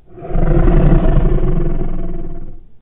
Monster Long Roar 1
A long monster roar.
long, creature, roar, fantasy, monster